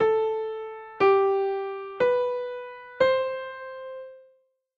Four notes (A4,G4,B4,C5) played one after the other at 60 bpm, generated by an online MIDI editor.